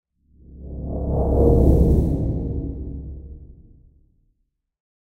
Epic whoosh
verby electronic whoosh sound uploaded by request
low, whoosh